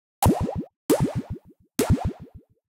Water Hit 3
A series of one-shot snare hits that sound like droplets of water. I believe I made this thing using old plugins from Cycle '74.
drums, water